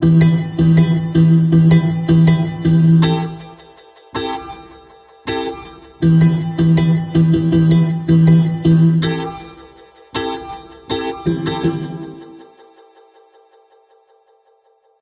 Hip Hop1 80 BPM
background, beat, broadcast, chord, club, dance, dancing, disco, drop, hip-hop, instrumental, interlude, intro, jingle, loop, mix, move, music, part, pattern, pbm, podcast, radio, rap, sample, sound, stabs, stereo, trailer